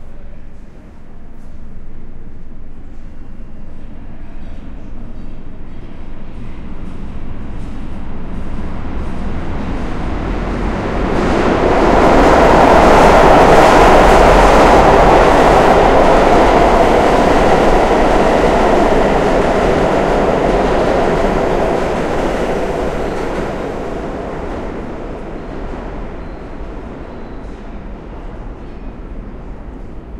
Subway Pass Train
train, city, undergroud, platform, subway, new-york, field-recording, loud, nyc, passing